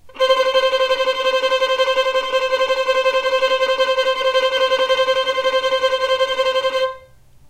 violin tremolo C4
tremolo, violin